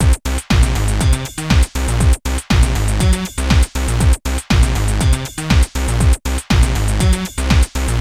all 4 loops together